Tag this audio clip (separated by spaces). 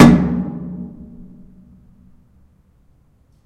city clean field-recording high-quality industrial metal metallic percussion percussive urban